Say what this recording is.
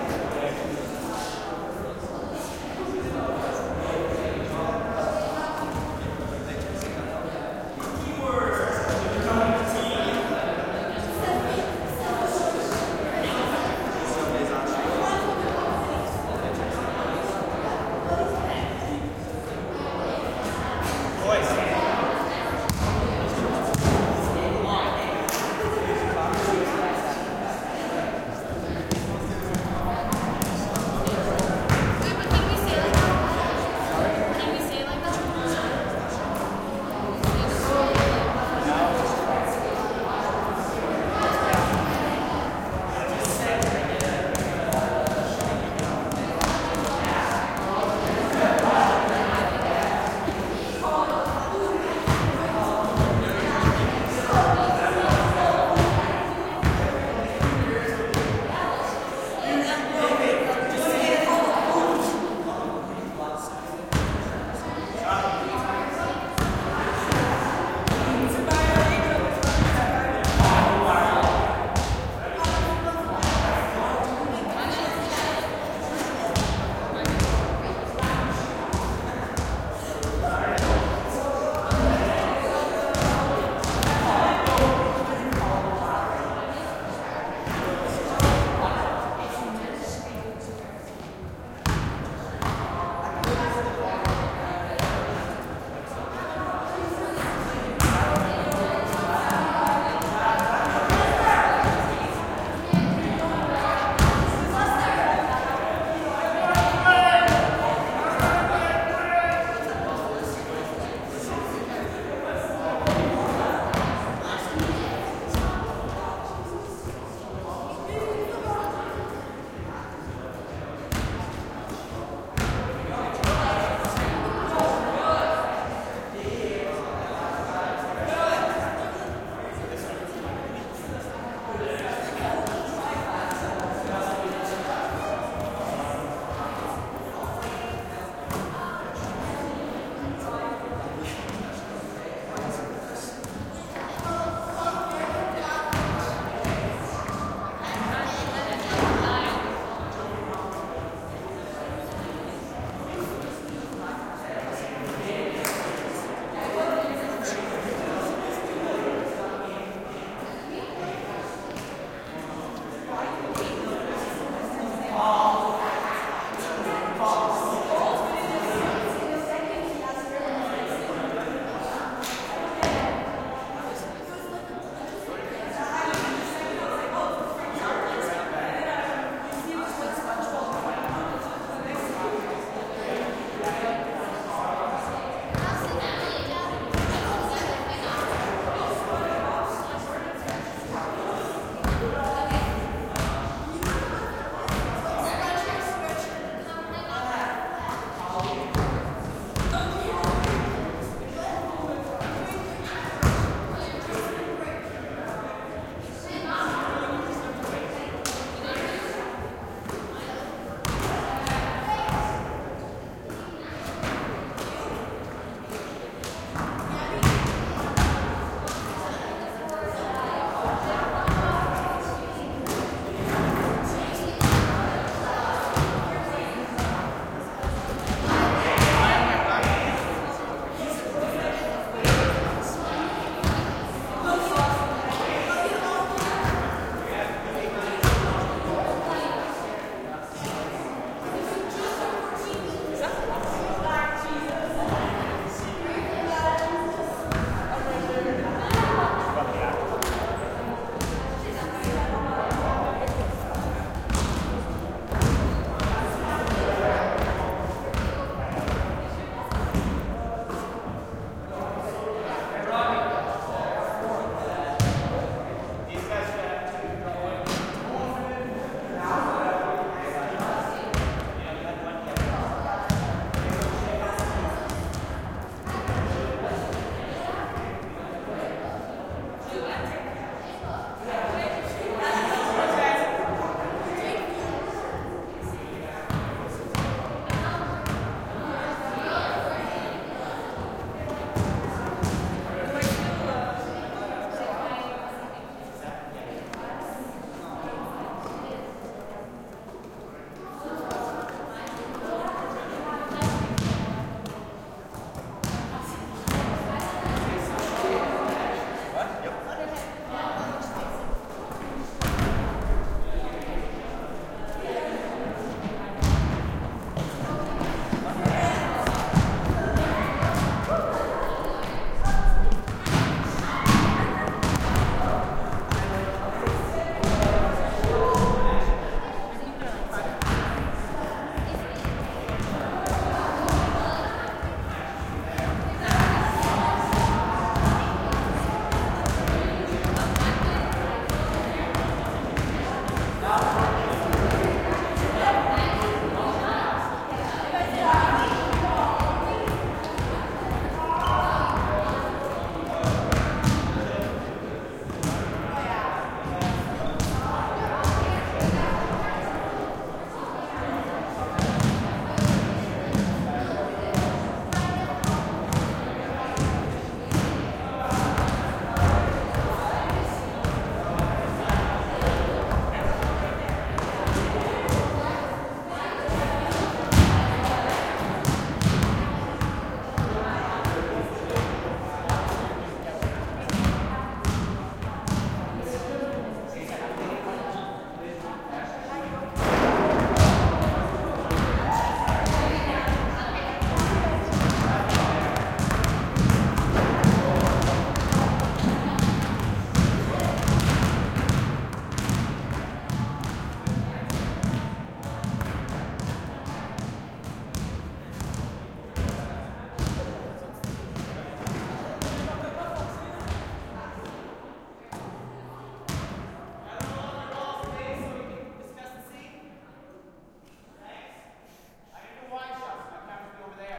basketball high school gym1
basketball high school gym